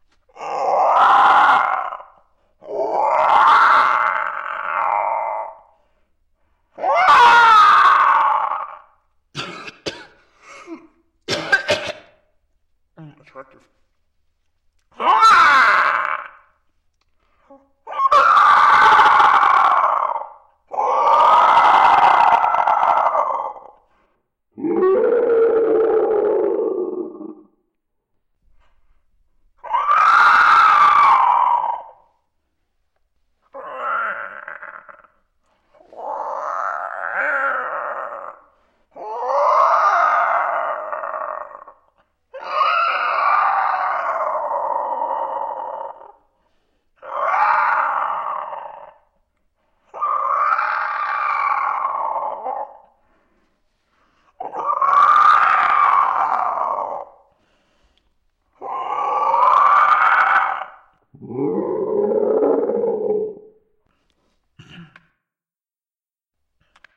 Me making raptor noises with a slight echo and a pitch shift. A few of them are much deeper, like a t-rex. I get slightly choked in the middle